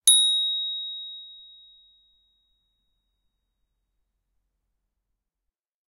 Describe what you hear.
Bell, Candle Damper, A (H1)
Raw audio of a metal, candle damper being struck with a metal mallet. Recorded simultaneously with a Zoom H1 and Zoom H4n Pro in order to compare the quality. The recorder was about 50cm away from the bell.
An example of how you might credit is by putting this in the description/credits:
The sound was recorded using a "H1 Zoom recorder" on 6th November 2017.